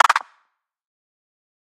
layered, filtered, timestretched, percussion.